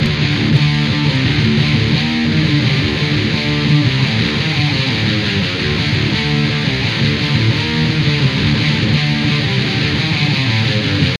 Metal Guitar 10 phrase J 2
metal guitar phrase
guitar, metal